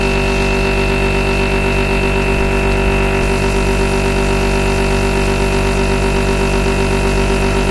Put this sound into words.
machine-hum
short loop of my fridge